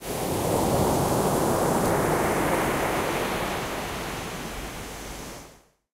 Beach short-1
Nature, Sea, Splash, Water, Ocean, Beach, Peaceful, Waves, field-recording